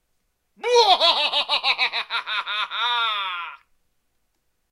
evil laugh-12
After making them ash up with Analogchill's Scream file i got bored and made this small pack of evil laughs.
solo, cackle, male